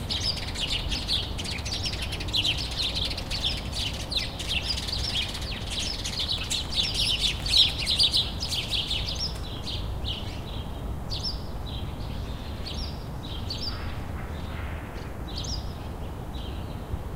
Chirping sparrows. Spring. Hum of city.
Recorded 20-04-2013.
XY-stereo, Tascam DR-40. deadcat